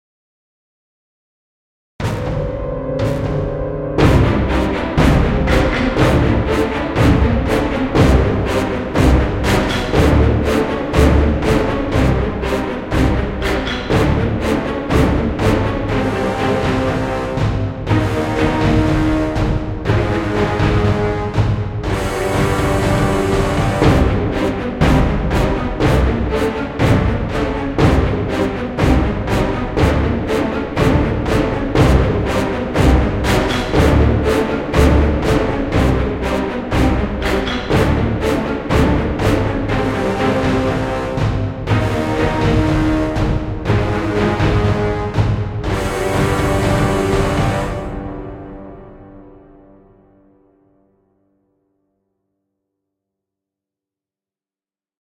Horror Hit 1
Produced in FL Studio using various VSTs
ambient, creepy, dark, drama, horror, horror-soundtrack, sinister, soundscape, soundtrack, suspense, terror, thrill